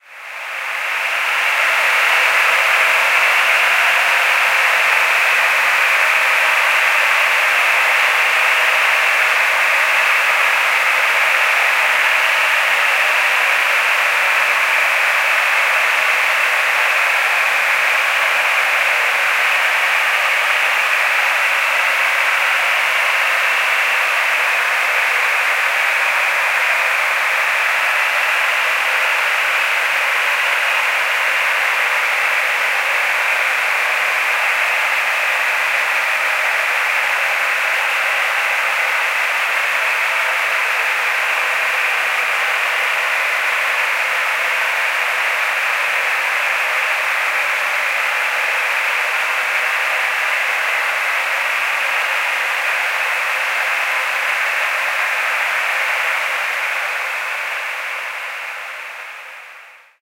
This sample is part of the "Space Drone 3" sample pack. 1minute of pure ambient space drone. Another dense industrial atmospheric drone.
ambient, soundscape, space, reaktor, drone